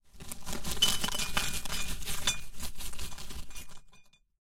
emptying snack bag
Serving a snack, Recorded w/ m-audio NOVA condenser microphone.